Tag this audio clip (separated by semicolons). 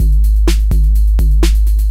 woofer; punch; trip; bass; hop; hard; break; heavy; 808; boom; industrial; breakbeat